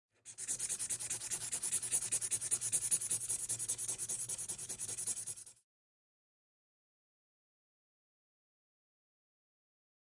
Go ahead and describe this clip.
15GGalasovaK fix
This sound is a water fix.